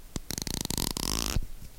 Peeling packing tape